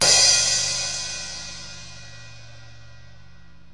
crash 1 hit 1
This was a hard hit on my 14" zildajin